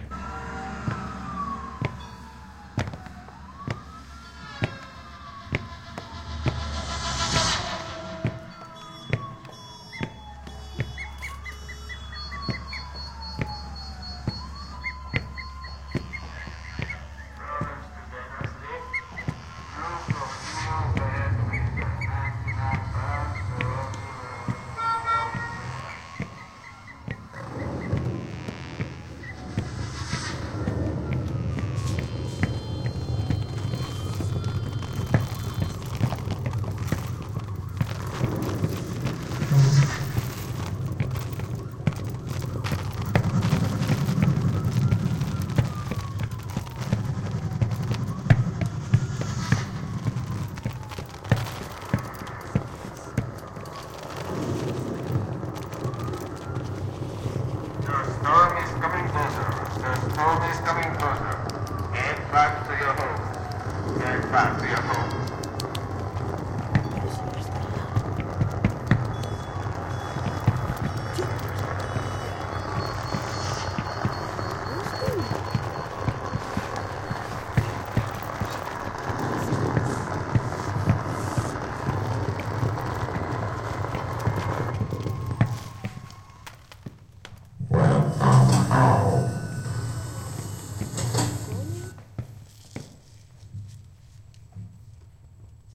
200213 Escola Projecte Ciutat del Futur
20-02-13 Escola Projecte
History of an action that occurs in a future city. Foot step sounds surrounded by future city sounds like motors and propellants. Starts to rain. The rain increases and the footsteps accelerate until the character arrives home and a robotic voice says welcome home.
ciutat, MTG, city, Phonos, futur, future